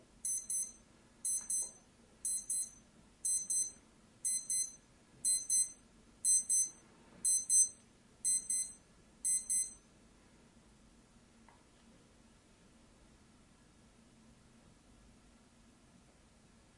20070504.FostexFR2LE
beep alarms from my Sanyo watch, and 'silence' as heard by Sennheiser ME66 (left) and Sennheiser MKH60 (right channel). The recorder was a Fostex FR2LE, with no mic output trimming (i.e. both tiny knobs set full to the right) and level set one notch below maximum. Worth noting the different sound of the K6 mic vs the MKH, which has flatter response and less self-noise.
alarm, beep, gear, mic-comparison, pream-test, silence, watch